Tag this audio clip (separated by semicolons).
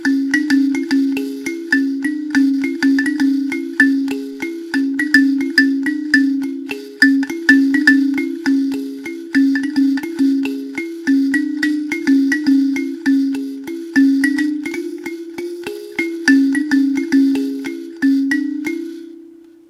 kalimba; thumb-piano; musical-instruments